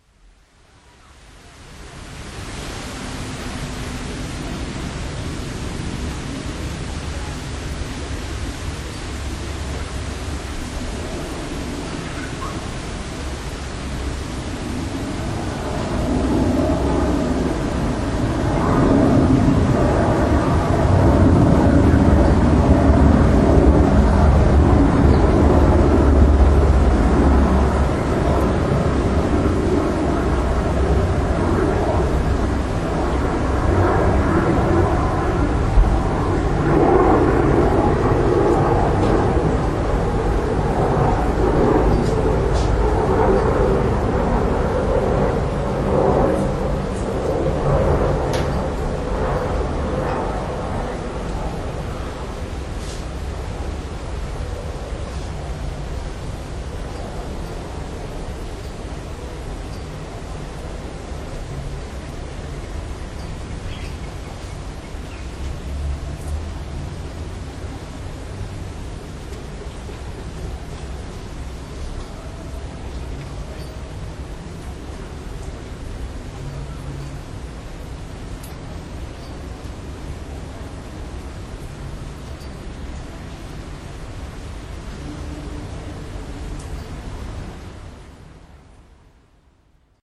An airplane heading for Schiphol Airport Amsterdam
field-recording, airplane, noise, traffic